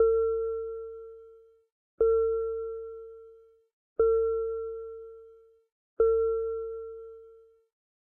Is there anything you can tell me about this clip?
A signal similar to the beep of seat belts. Perhaps it will be useful for you. Enjoy it! If it does not bother you, share links to your work where this sound was used.
Note: audio quality is always better when downloaded.